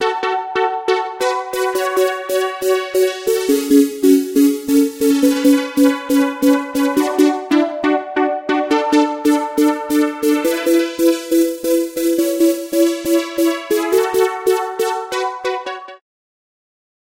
Phasewaver Sample, Preset 2 Double Sine Force Lead - Phase Distortion VST, VST3, Audio Unit
Preset 2 Double Sine Force, lead sample of Phasewaver, a polyphonic phase distortion synthesizer (VST, VST3 and Audio Unit plugin).